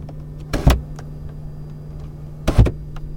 Turning knob to control cockpit air inlet.
air, car, cockpit, inlet, knob, lever